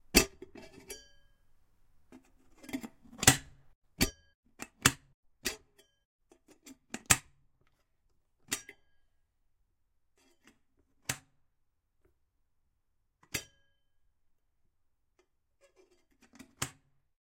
Recorded with a Zoom H6 and Stereo capsule. Sound of a kettle lid being opened and closed with variations